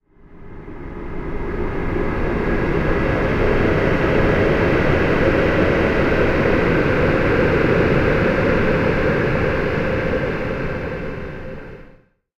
Spooky Hum
Pad sound, very airy and tense.
aity, ambient, dark, dirge, noise, pad, soundscape, tension